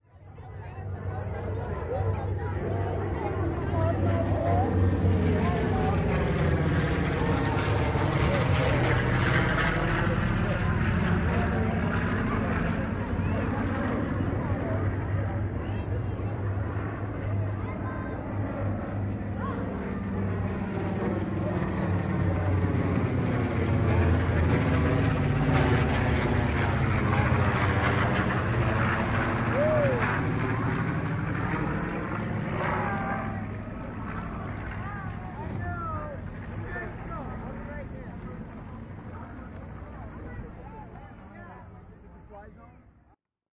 This was recorded on July 4th, 2013 in Enumclaw, WA. I was not expecting the bomber to fly over and it was a tribute to one of the last surviving members (Edward Saylor) of the Doolittle Raid on Tokyo on April 18th, 1942. He was in the parade too. I can just imagine the sound of hundreds flying over in WW2. There is a bit of distortion as the plane flew directly overhead.
WWII, Mitchell, Saylor, 4th, Flyby, July, B-25, Bomber, Parade, Edward